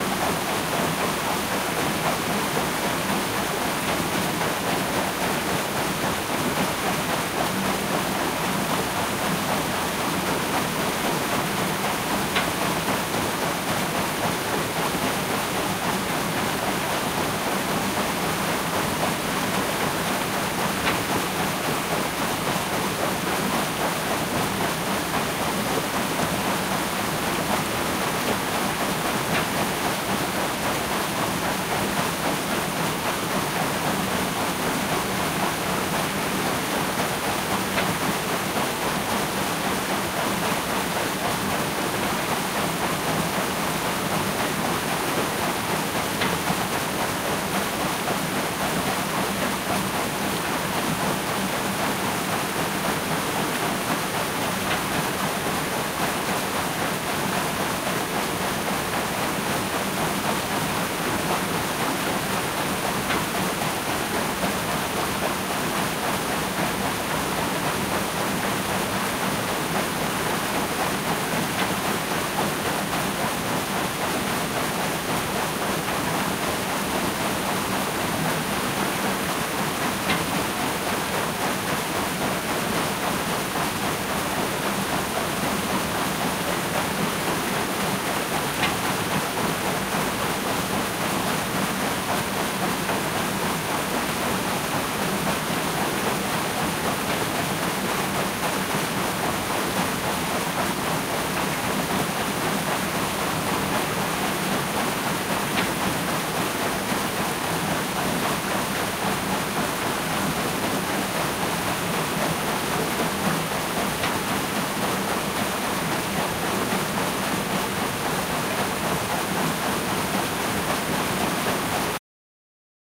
Water mill - mill wheel from through the window
These sounds come from a water mill in Golspie, Scotland. It's been built in 1863 and is still in use!
Here you can hear the mill wheel from the outside of the building.
historic, mechanical, machinery, water-mill